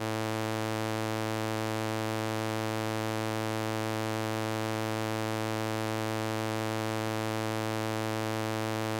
Transistor Organ Violin - A2
Sample of an old combo organ set to its "Violin" setting.
Recorded with a DI-Box and a RME Babyface using Cubase.
Have fun!
70s, analog, analogue, combo-organ, electric-organ, electronic-organ, raw, sample, string-emulation, strings, transistor-organ, vibrato, vintage